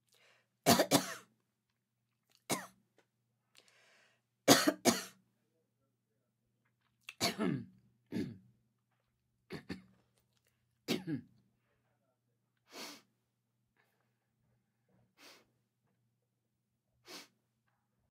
Woman, female, coughing, sniffing
Middle-aged woman coughing and sniffing
cough, sniff, clear, throat, lady, woman, sick, cold